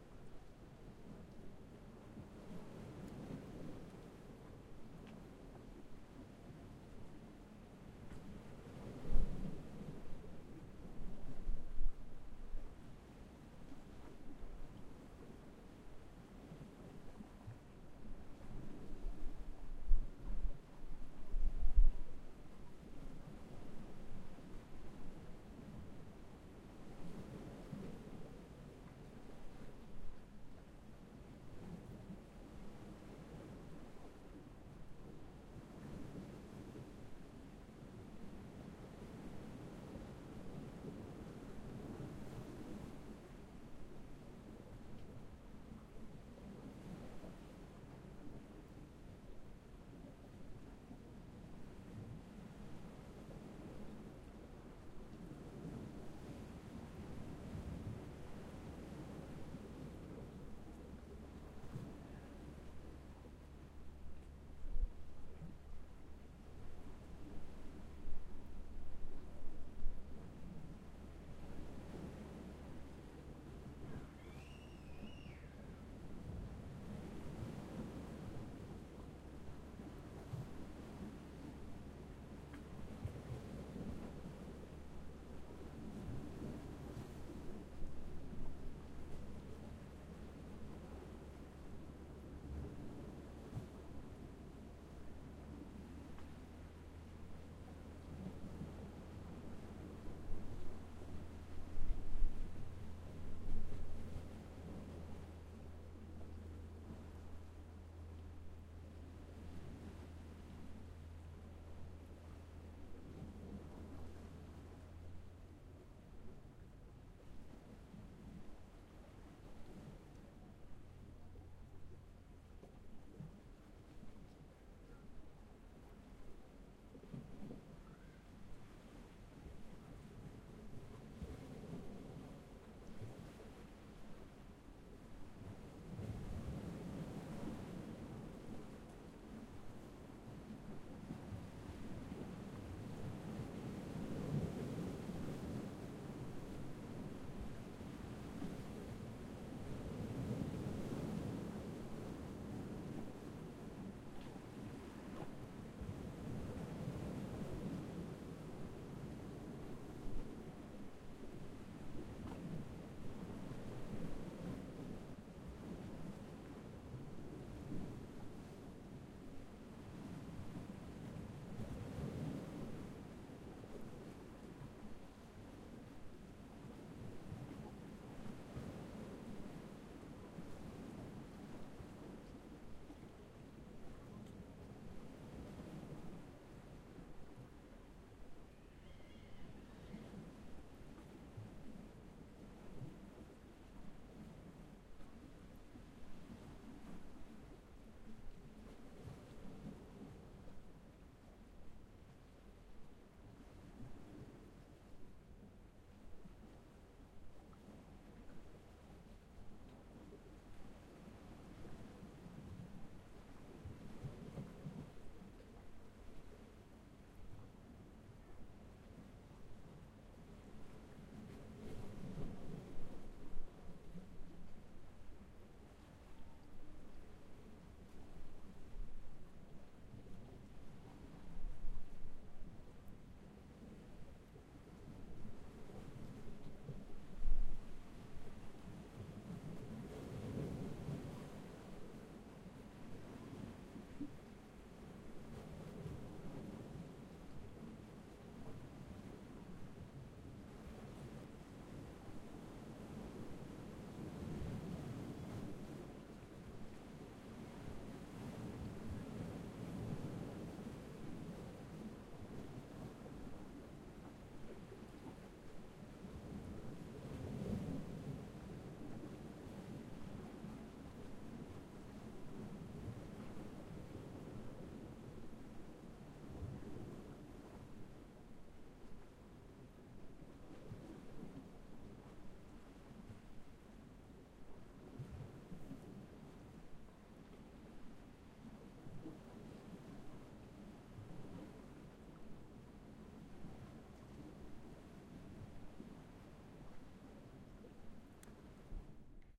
Recorded in Quercianella, Livorno, Italy
ambience, ambient, bay, beach, italy, leghorn, livorno, mediterranean, nature, ocean, rocks, sea, soundscape, stereo, water, waves